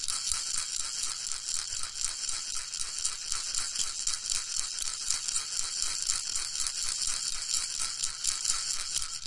Percussion kit and loops made with various baby toys recorded with 3 different condenser microphones and edited in Wavosaur.
kit, toy, percussion, loop, rattle